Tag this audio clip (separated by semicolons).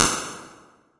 hand-drawn; sample